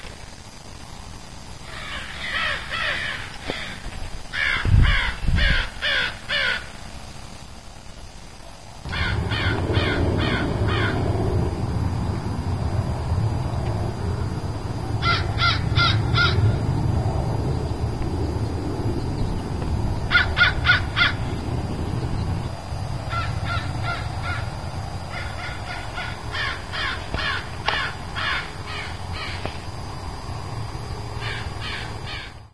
crow-calls
This is about a 30 sec. urban field recording of several crows flying around the neighborhood and talking with one another. Recorded early on a December Sunday morning in suburban San Diego, California. Some distant jet noise in part of the sample. Assembled from three short recordings made with a digital still camera.